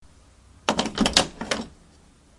Opening the wooden bathroom door in the hallway of my house, on 5/27/17. Recorded with a sony icd-px333. I think the doorknob might be of brass or some cheap light metal like aluminum, but the sound is pretty good, I think. The doorknob is rather noisy.